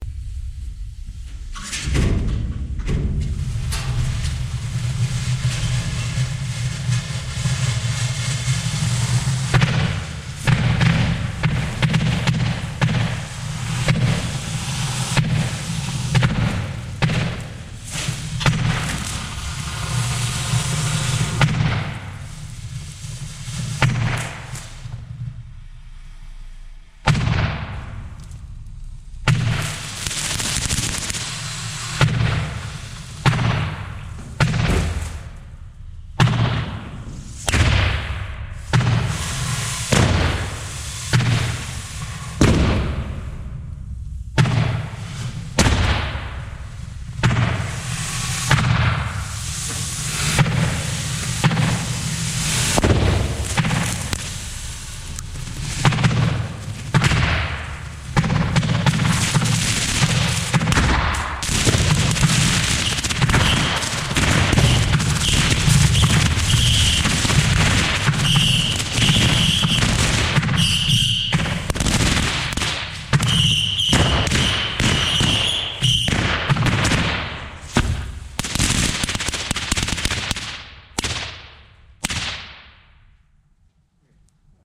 Fireworks in Silo
I let off fireworks inside a silo. Unfortunately the best audio I got was from the gopro inside. In the beginning you hear the door slam, then the hissing of the fire shower which lights all the other fireworks - then things go crazy.